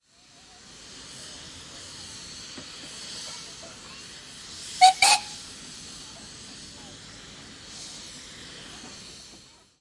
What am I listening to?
Steam whistle on vintage traction-engine; could also double as steam-engine,/steam-train